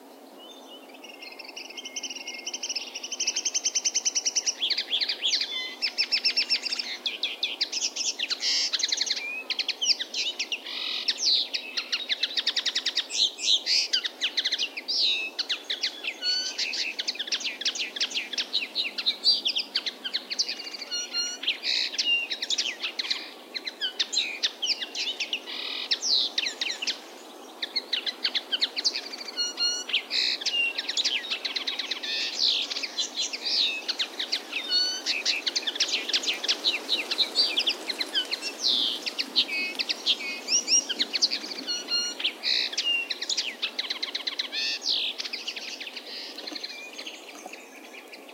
20060607.bird.gone.nuts
a bird singing like crazy and in a hurry. Don't know which species, but each time I listen to this song it makes me laugh. Recorded in Pine woodland near Hinojos, Huelva (South Spain). Sennheiser ME66 > Shure FP24 > iRiver H120(rockbox) / un pájaro que canta como loco y con muchísima prisa. Me troncho cada vez que lo oigo
birds, donana, environmental-sounds-research, field-recording, forest, nature, spring